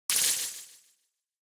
Retro Game Sounds SFX 61
gameaudio, soundeffect, pickup, Shoot, fx, sounddesign, shooting, sound, sfx, Sounds, effect, gamesound